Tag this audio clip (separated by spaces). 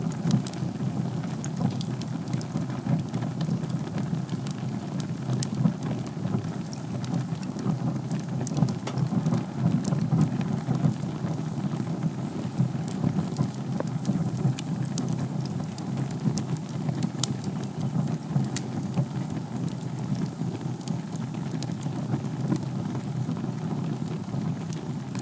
fire fireplace flame